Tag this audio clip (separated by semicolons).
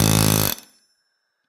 1bar; 80bpm; air-pressure; atlas-copco; blacksmith; chisel-hammer; crafts; forging; labor; metalwork; motor; pneumatic; pneumatic-tools; red-glow; steel; tools; work